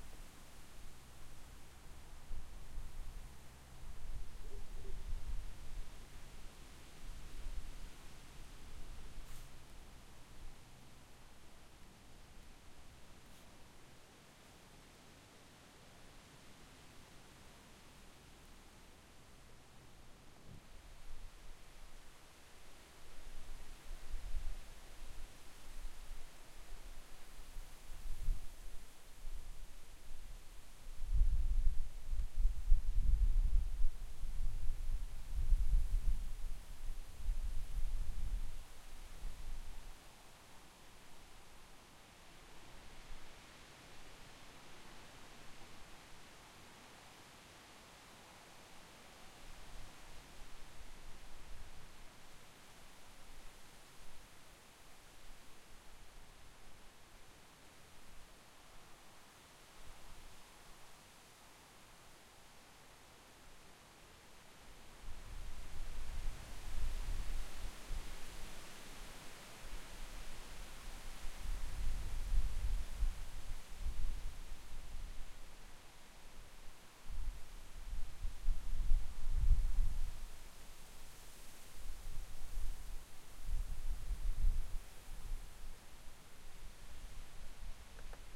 Wind Through Trees ambience
Ambience of a steady wind blowing through a woodsy area.